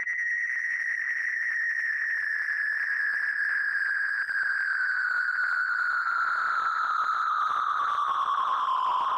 Descending Screech
Heavily processed VST synth sounds using various phasers, reverbs and filters.
Effects, Laser, Phaser, Screech, Space, Spaceship, VST